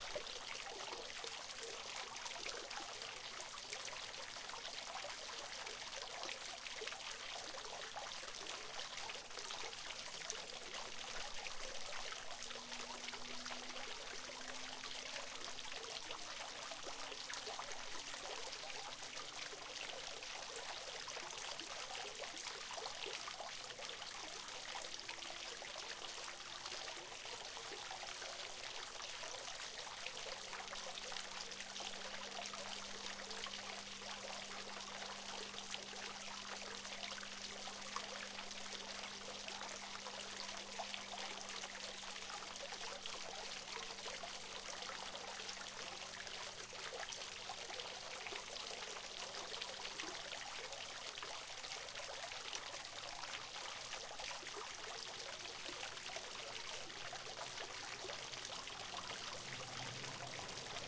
A small water fountain.